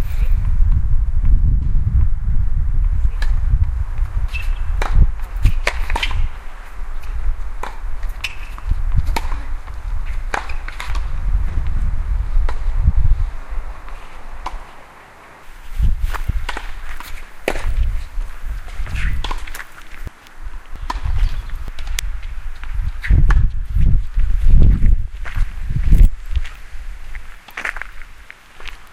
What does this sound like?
This sound was recorded with an Olympus WS550-M and it's the sound of the municipal's tennis courts.